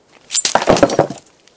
Breaking Glass 23
Includes some background noise of wind. Recorded with a black Sony IC voice recorder.
glasses, break, breaking, glass, shatter, pottery, smash, crack, crash, splintering, shards